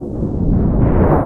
Stormy button sound. Recorded, mixed and mastered in cAve studio, Plzen, 2002
you can support me by sending me some money: